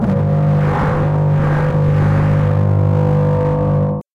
Tech Horn
A blaring horn made up of lots of synthetic sounds layered over the top of one another.